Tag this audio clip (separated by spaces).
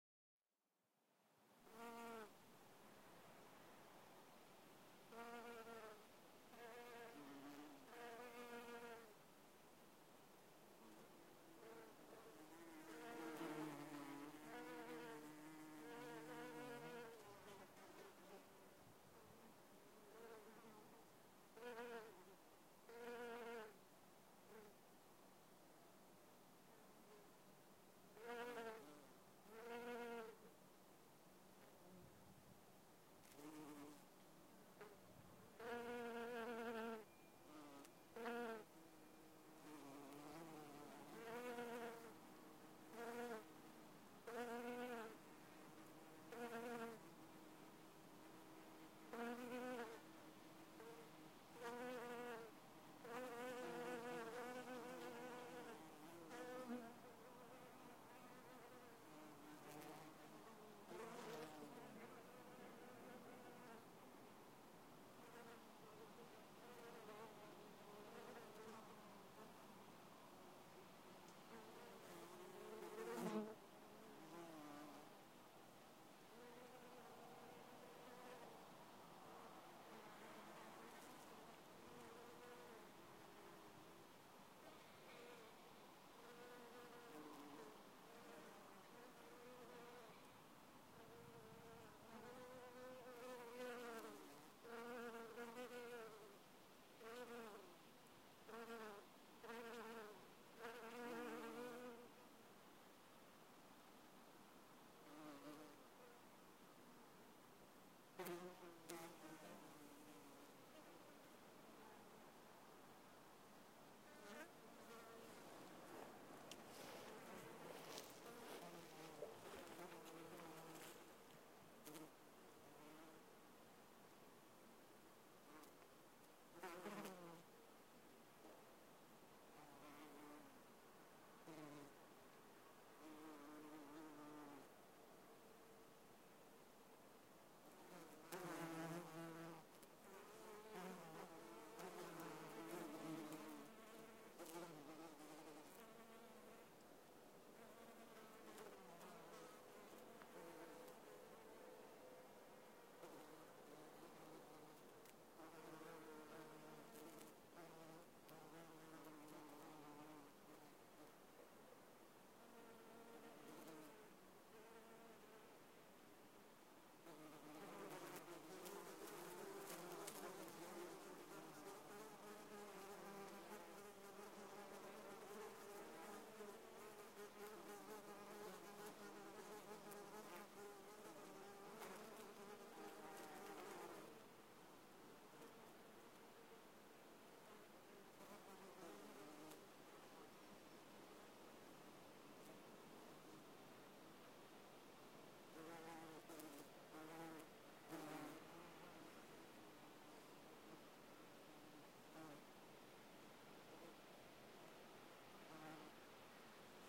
600 bee bees buzz buzzing field-recording fly h4n insect insects mke nature sennheiser swarm wasp zoom